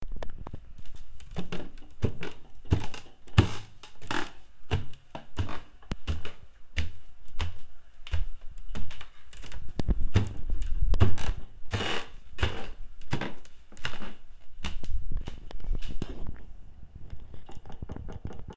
up squeaky stairs
walking up squeaky wood stairs in my 100 year old home. Footsteps, creaks, and other soft sounds
squeaky, creepy, staircase, steps, footsteps, stairs, old, haunted